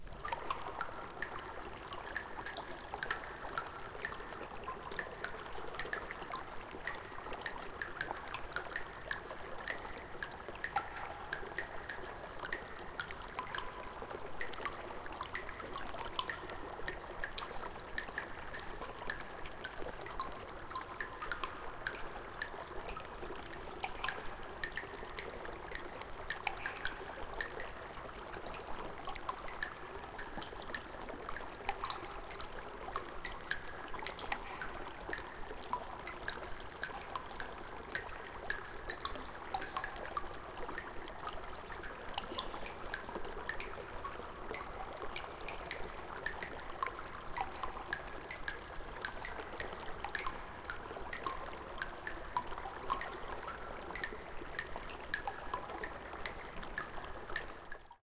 Sewer Soundscape, A
A simple artificial soundscape for a sewer, built by combining and editing the following sounds I have uploaded:
An example of how you might credit is by putting this in the description/credits:
The sounds were recorded using a "H1 Zoom recorder" and edited on 6th April 2016.
soundscape, atmosphere, sewer, sound, scape, ambient, ambience, background, ambiance